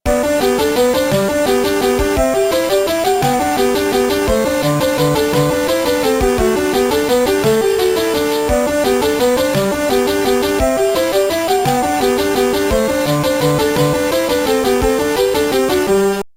Music2 IBSP1
- This samplepack contains 123 samples recorded from a Cicuit Bent Turkish Toyphone.
It has three subfolders containing a) sounds from the Toyphone before bending, (including the numbers from 0-9 in Turkish)*, b) unprocessed Circuit Bent sounds and c) a selection of sounds created with the Toyphone and a Kaoss Pad quad.
*handy if you’ve always wanted to do that Turkish cover of Kraftwerk’s ‘Numbers’
bend, bending, bent, circuit, glitch